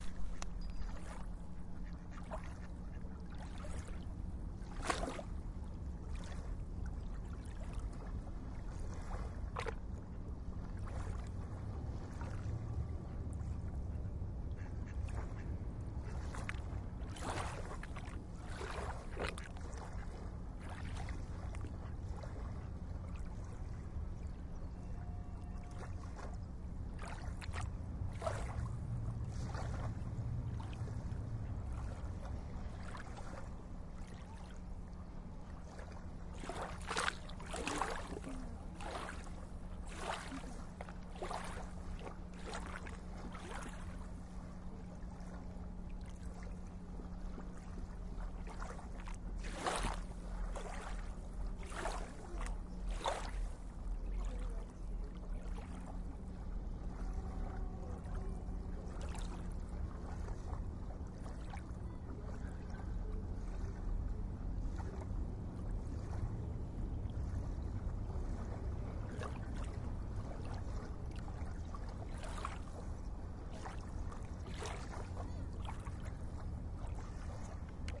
Lakeside ambience
Water splashes and quacking duck on the lake shore.
Recorded with Tascam DR-05